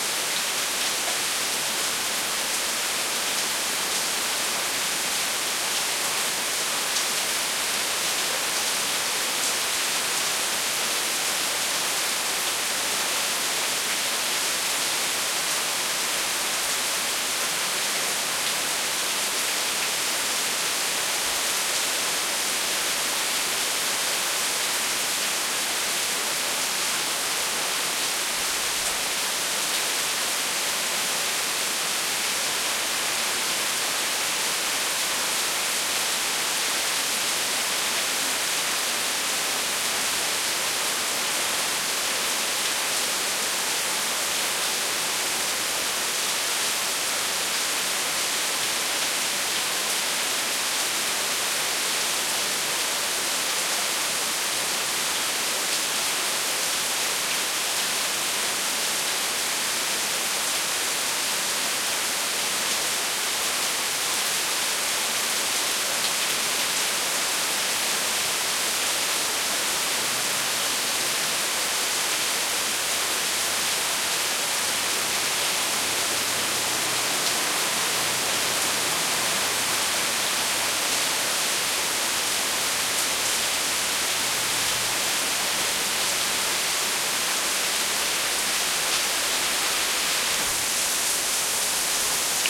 Heavy Rain from Front Porch
Stereo recording of the sound of heavy rain recorded from the front porch of an urban row-home.